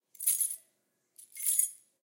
Keys Handling 8
The enjoyable and satisfying clinking symphony of handling keys on a ring
Any credit is more than welcome.
scrape, foley, sfx, key, soundeffects, keyunlock, drop, keyfumble, jingle, jingling, keylock, clink, turn, keychain, keyinsert, handling